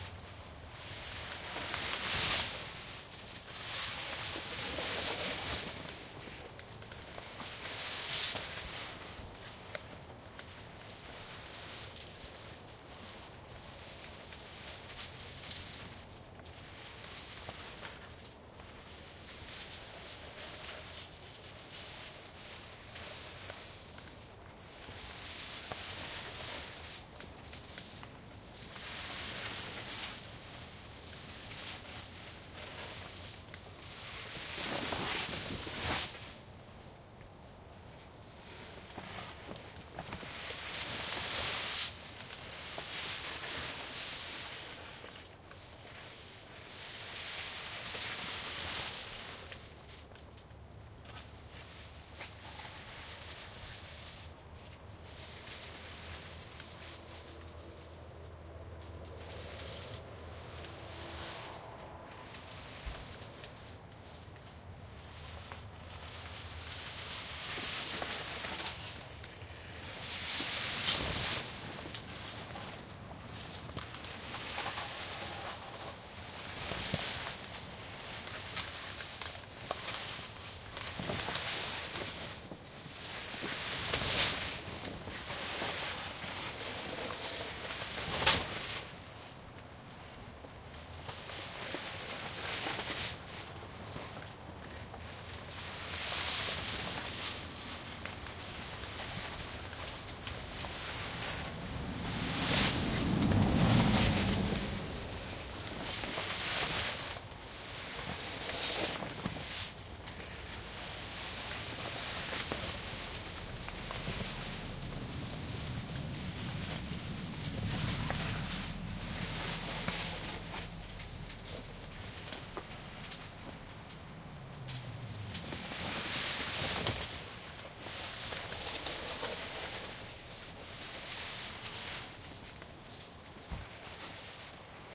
plastic car shetler flap4wide
plastic car tent shelter flap wide int, with car inside